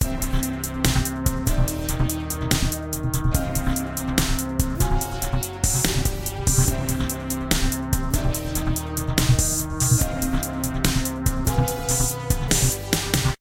A rather upbeat and news/science sounding loop. 144 BPM in the key of C